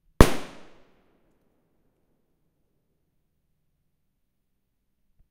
Title: Forest
Date: ~07.2016
Details: Recorded reverb with balloon outside.